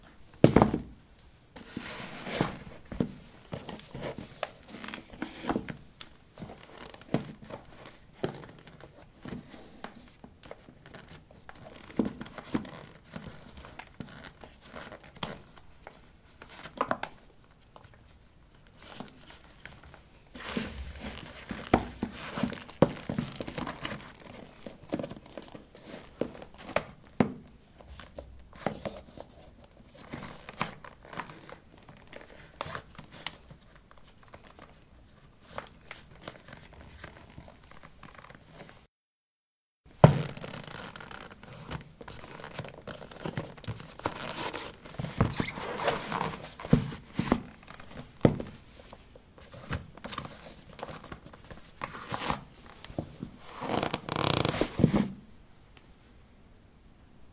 ceramic 18d trekkingboots onoff
Putting trekking boots on/off on ceramic tiles. Recorded with a ZOOM H2 in a bathroom of a house, normalized with Audacity.
steps, footsteps, footstep